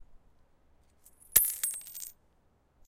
Drop Coins 2
dropping coins on stone floor
coins, dropping, floor, drop, stone